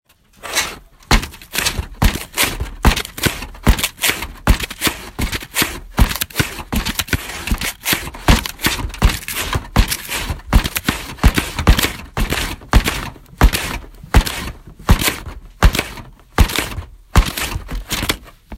Slamming noise
Another sound accomplished downstairs at the bookstore called Nerman's Books and Collectibles on Osborne Street.
I used the fridge door to open and close to record 19 seconds of sound, however, the suction of the sound of the gasket of that refrigerator is heard in this audio to note - listen carefully!
bam, bang, slam, thud